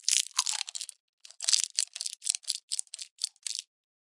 candy wrapper bite chew B
biting into a plastic candy wrapper and then chewing. yummy!